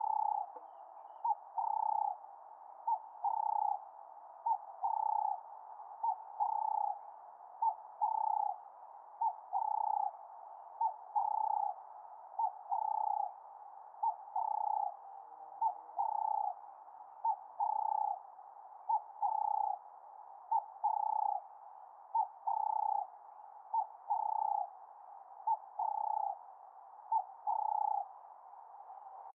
Cape turtle dove cooing
The call of a Cape turtle dove that was perched in a tree.